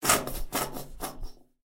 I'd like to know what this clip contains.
delphis EMPTY TUBE LOOP #074
EMPTY DIFFERENT TUBES WITH SOAP SHAMPOO OR JELLY